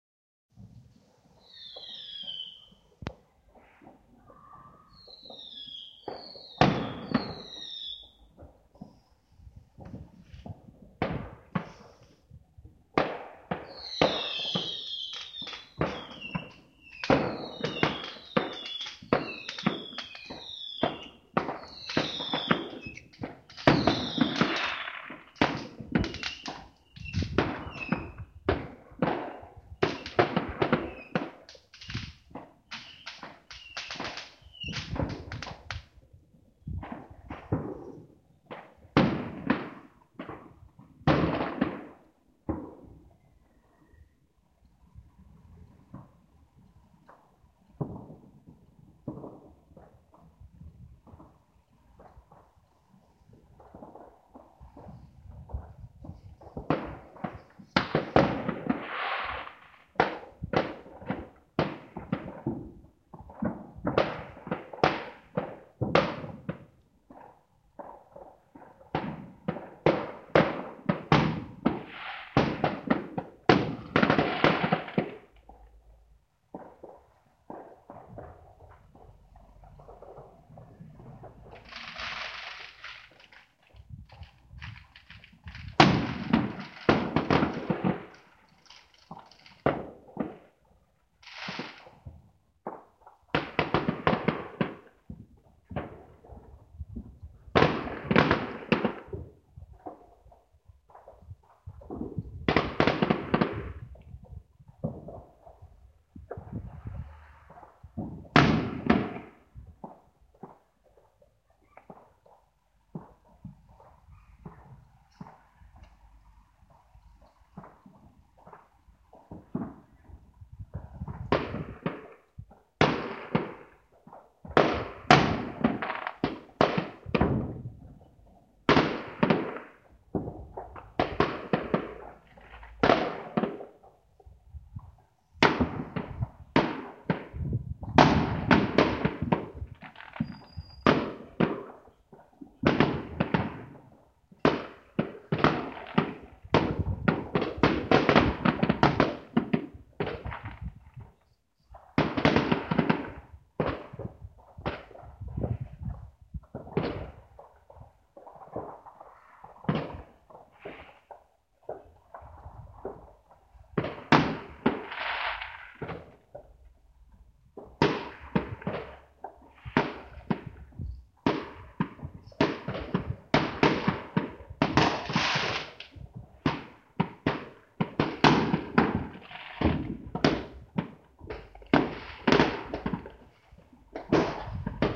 Fireworks in city
Recorded in a city with several firework displays nearby. Reverberation from buildings is quite noticeable. Recorded by a Wileyfox Storm.